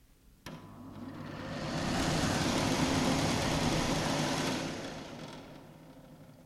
AVELINE Elodie 2013 2014 Séchoir
//////// Recording with using of Audacity (Time: 06,462sec)
Effect : reverberation (75% room size / pre-delay 10 ms / Reverberance 43% / Dampug 50% / Tone Low 100% / Tone High 100% / Wet gain -1 dB / Dry gain -1 dB / stereo Width 100% )
//////// Typologie : Continu Varié (V)
////// Morphologie:
- Masse : son seul complexe (nodal)
- timbre harmonique : terne, vibrant
- Grain : grain rugueux
- Allure : pas de vibrato, stable
- Dynamique : attaque douce et graduelle
Profil mélodique : variation glissante
Profil de masse / calibre : son couplé à du bruit
hand, hand-dryer, hygiene, campus, electric, restroom, wind, maintenance, dryer